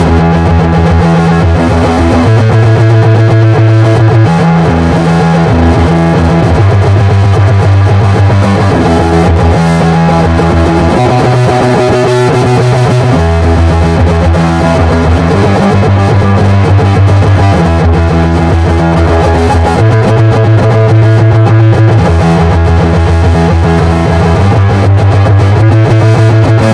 Jack processedBASSline 19(incomplete)
this is an excellent example of terrible rhythm
riff, iPhone-recording, fuzzy, bass, living-room, fingerstyle, loop